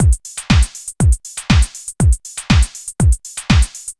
drum loop